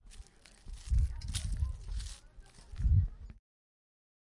steps on leaves
Girl walking on leaves
foot, footsteps, human, leaves, step, steps, walk